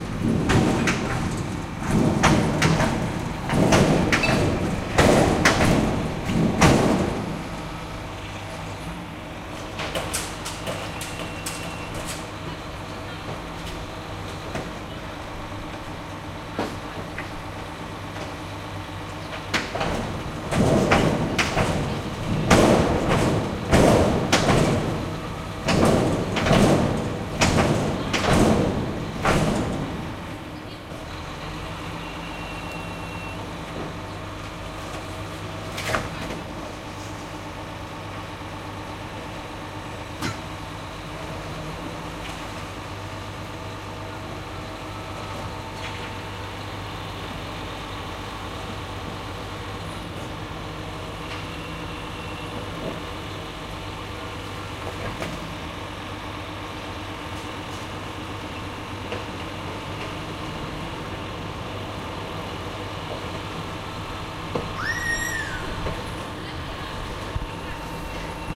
Garbage truck is emptying a mixed waste-container (2)
The noise making by a Garbage Truck (2013, Russia)